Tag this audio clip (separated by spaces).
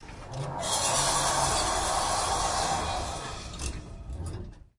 door elevator field-recording